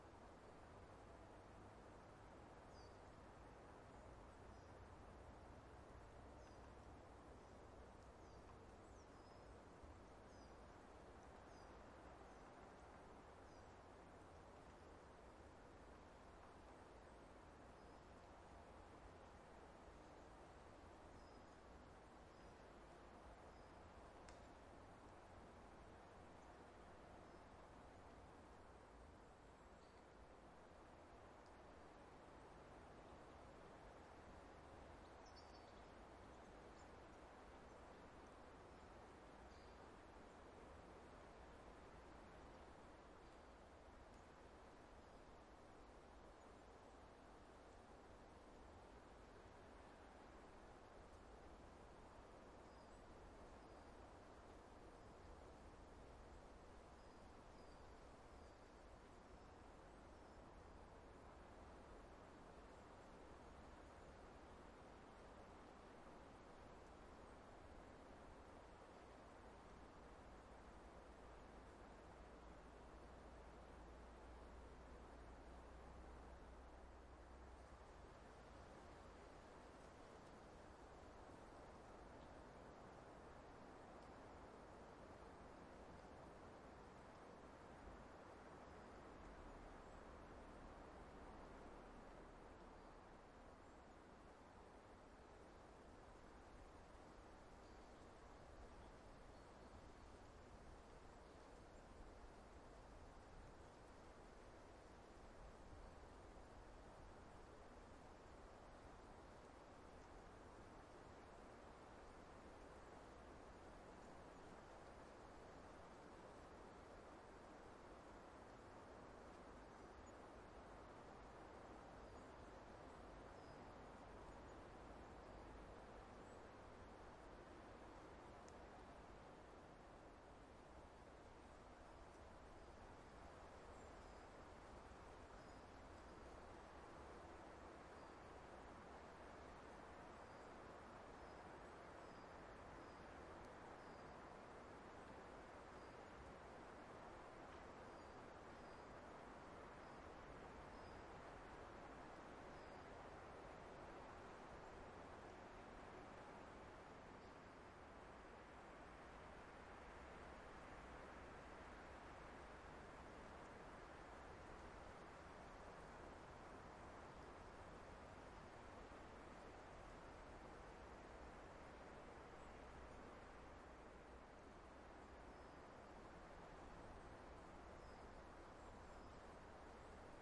Forest 9(traffic, cars, birds, leaves, trees)
ambient, forest